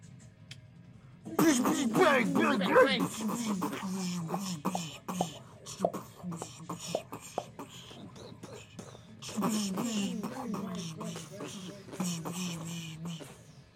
several guns firing
me and a friend making gun sounds with our mouths.